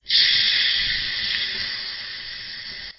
Water on sauna heater 1